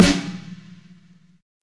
DW drum kit, used: Sennheiser e604 Drum Microphone, WaveLab, FL, Yamaha THR10, lenovo laptop